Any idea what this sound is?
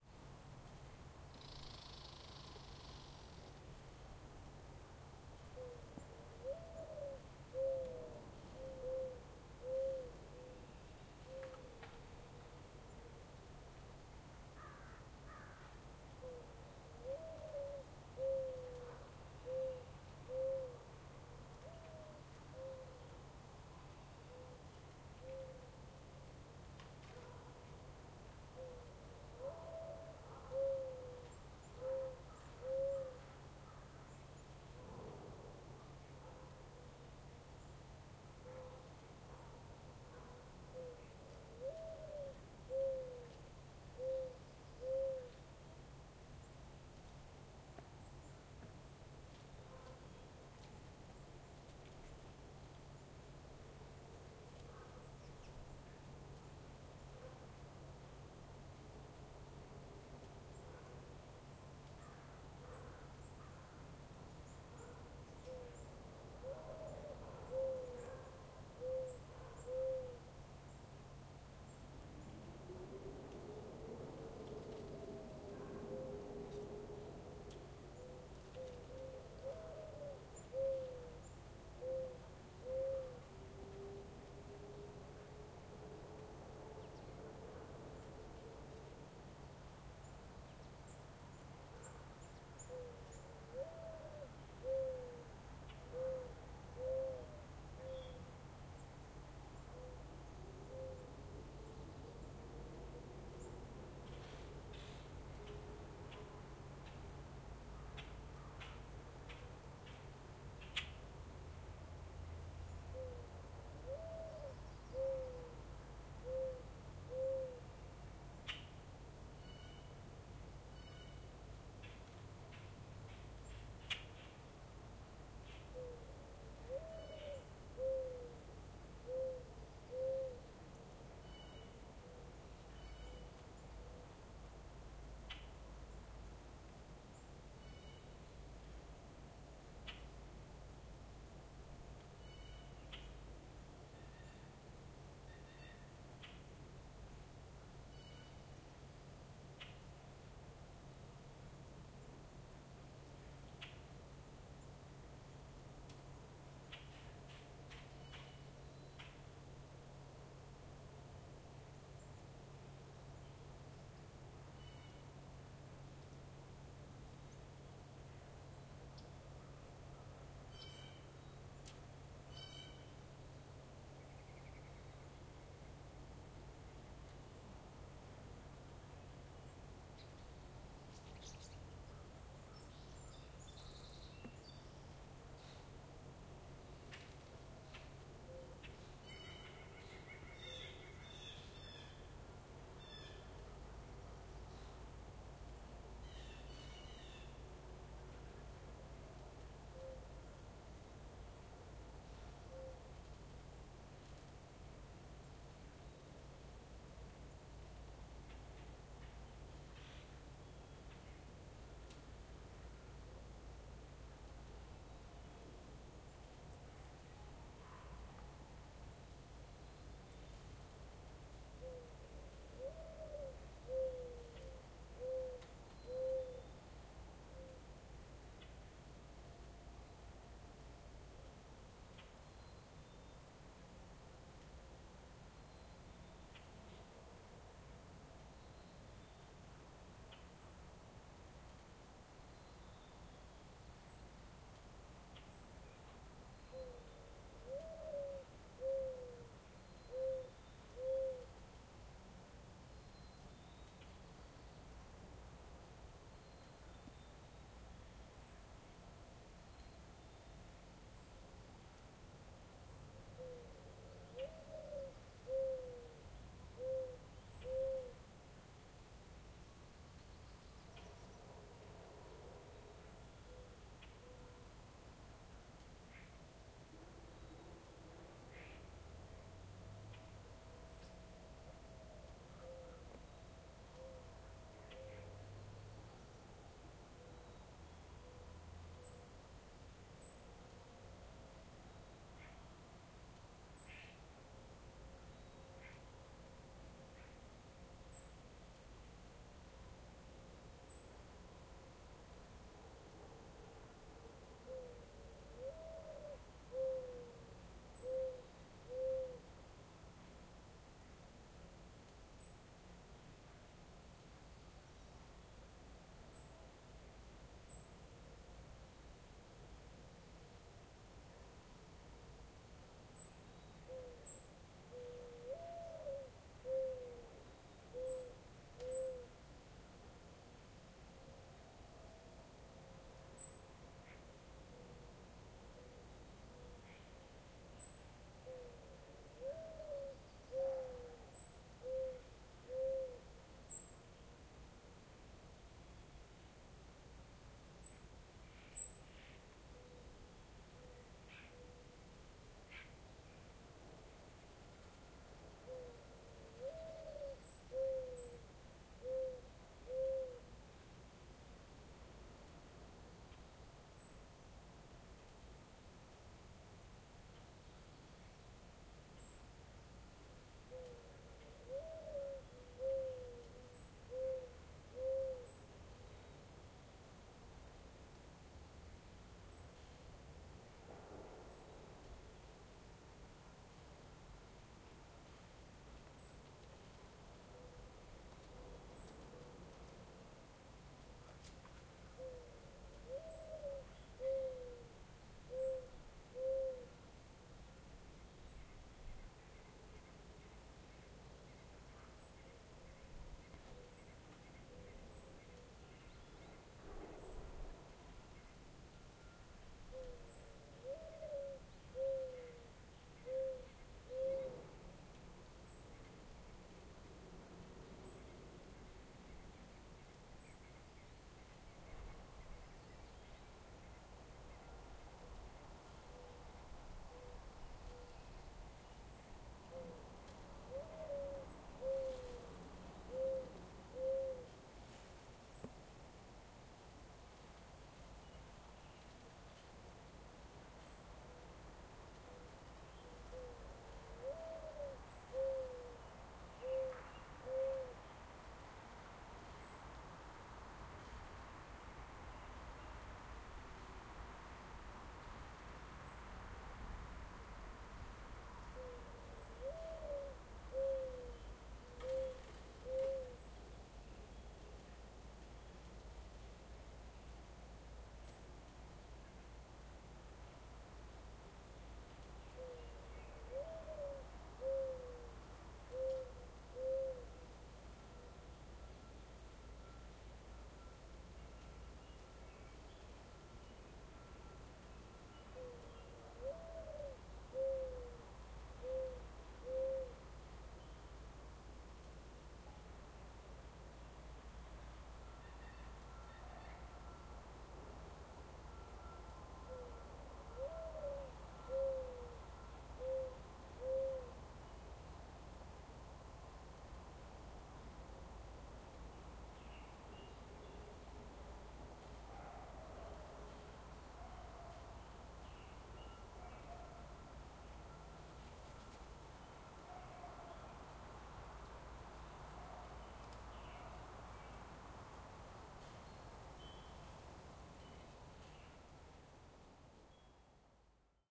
EXT spring light wind mourningdove MS
A light wind, calm with a reoccurring American Mourning Dove calling. Some close squirrels and distant traffic. This is a back pair (MS) of a quad recording with an H2.